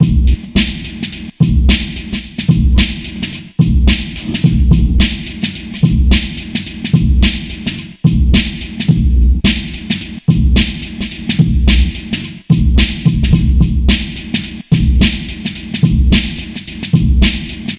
SlyBeat 108bpm
A tight drum loop, chopped up in Fruity Loops, and coloured with effects and compression, and finally put through a vocoder for that firm sound of mono recordings of the 60's and the 70's, later reused in old-school 90's hip-hop and breakbeat.
Music Loops for licensing:
hi-hat, breakbeat, hip-hop, beat, rhythm, 60s, 70s, drums, oldschool, 108, electronic, mono